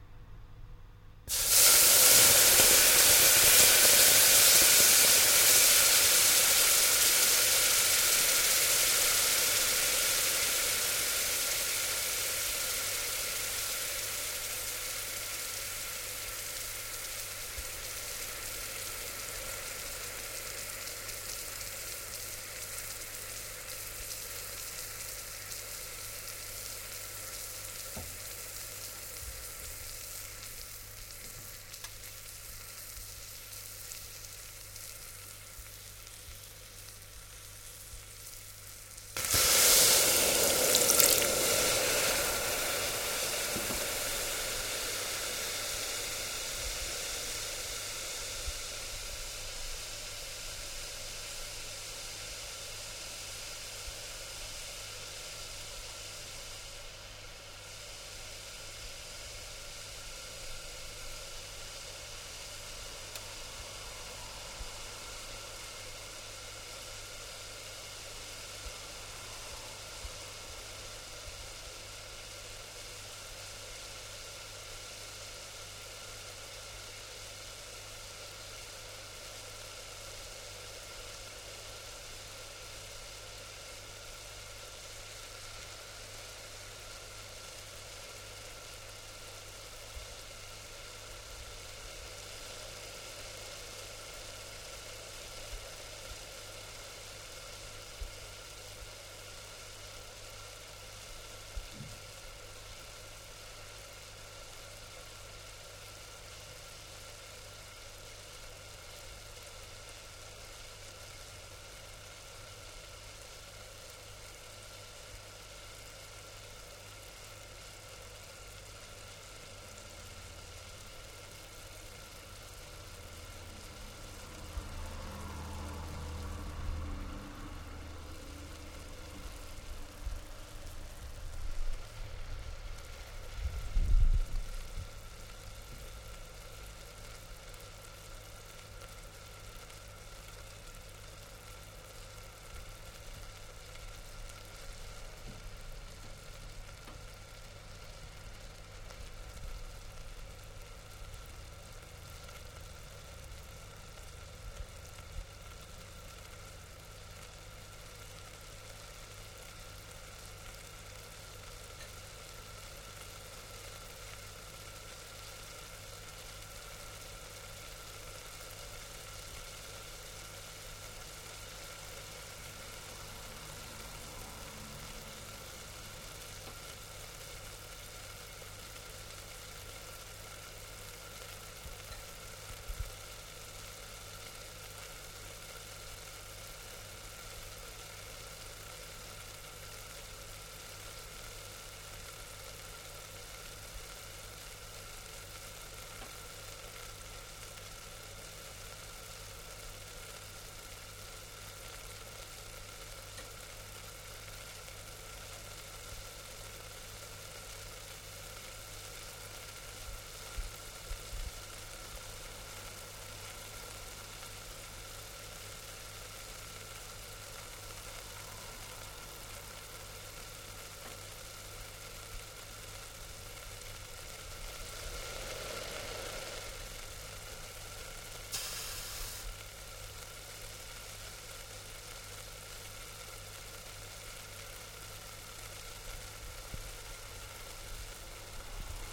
Water evaporating once dropped onto a hot pan - take 8.
evaporate, water, steam, hiss, kitchen, ice, vapour